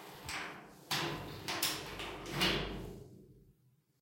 Locking metal door.
lock-door, locking-door, metal-door